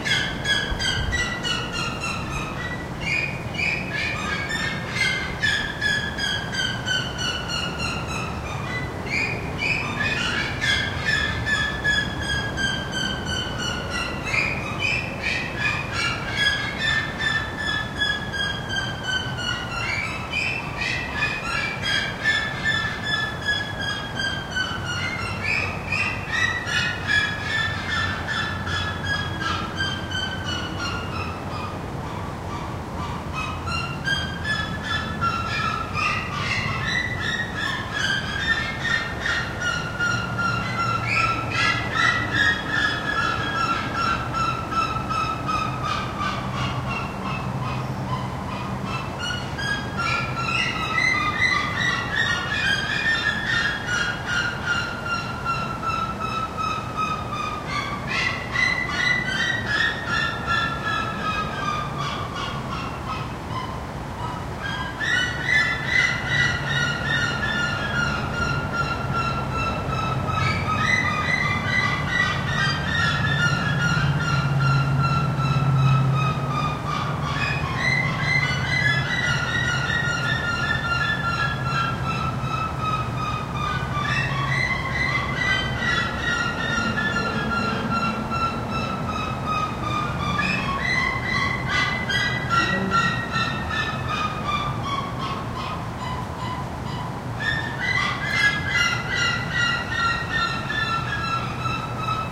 red legged seriema
Teritorial calls from a pair of Red-legged Seriemas. Recorded with a Zoom H2.
exotic, aviary, tropical, seriema, birds